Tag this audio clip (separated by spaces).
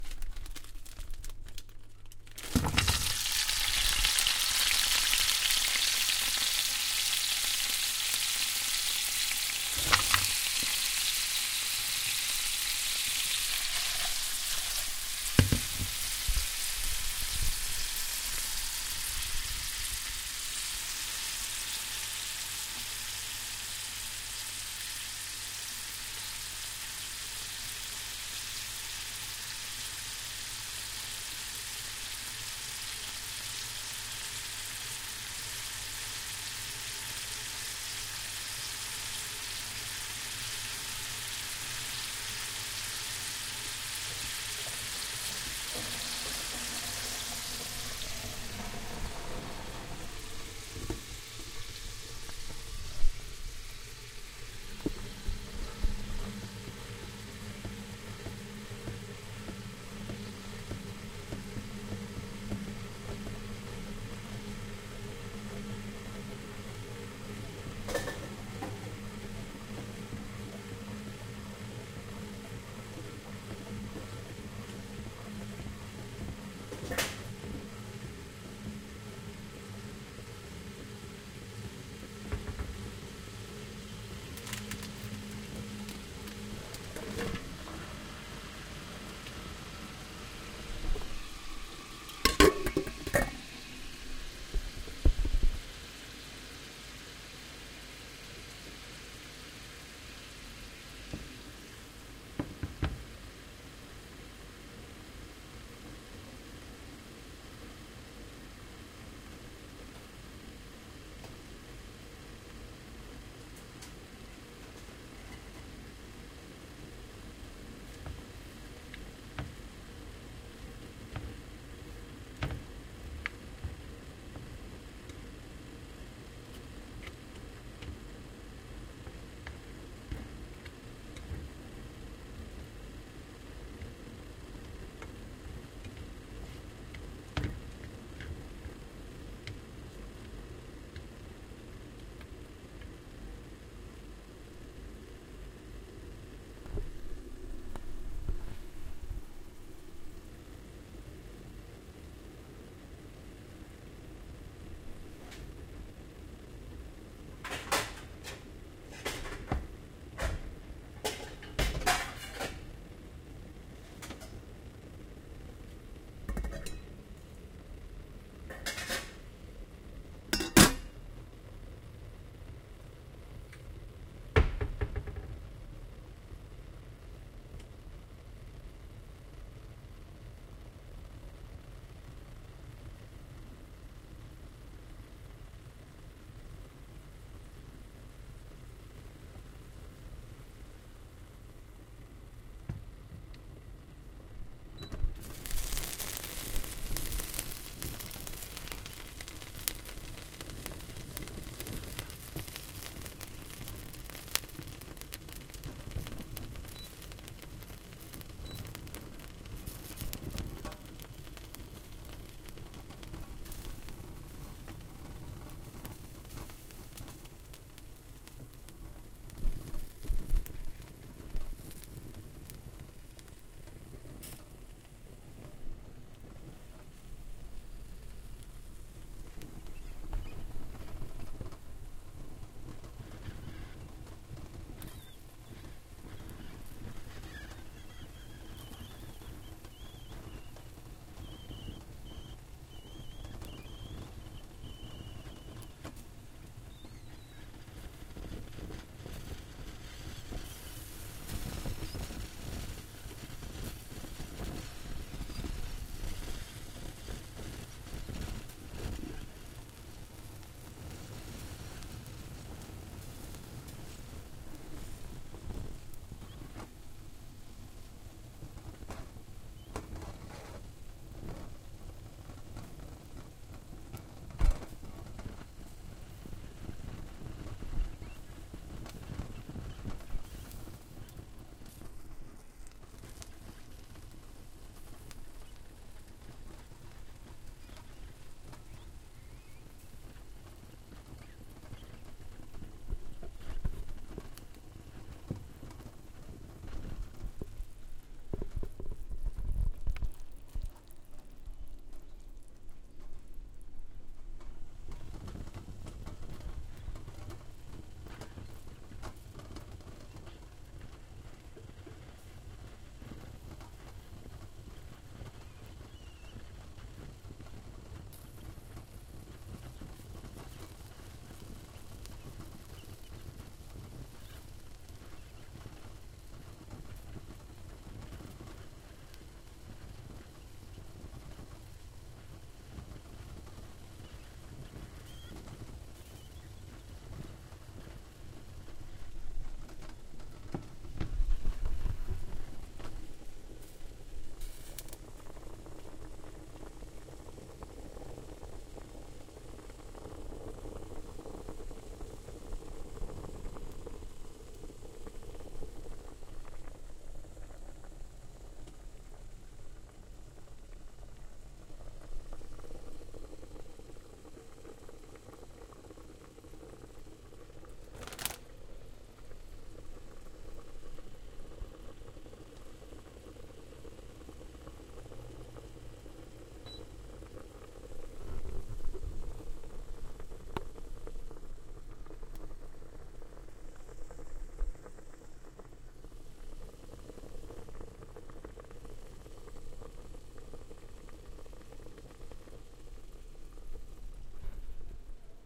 lids frying gurgling cooking process